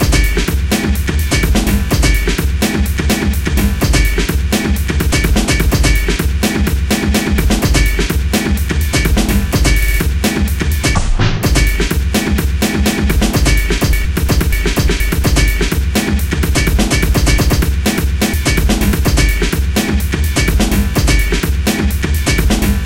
rotor pt1 remix
rotor pt1 sample rework (slices) with vst slicex + a new snare + a new kick.
All mixing with fl studio sequencer and final edition with doundforge 7
beat, beats, bigbeat, breakbeat, breakbeats, drum, drumbeat, drum-loop, drumloop, drums, loop, loops, quantized, remix, snare